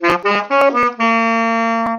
sax-phrase-T5-7
Tenor sax phrase. Sample I played and recorded with Audacity using my laptop computer built-in microphone Realtek HD. Phrase 7/7.
tenor-sax-phrase, sampled-instruments, jazz, sax, tenor-sax, saxophone, sax-phrase